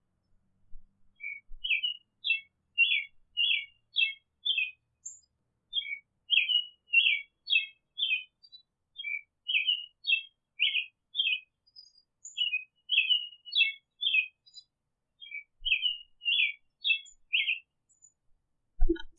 Bird1EQ
This is the equalization of a bird sound recorded on UMBC campus. The EQ removes background noise and isolates the bird.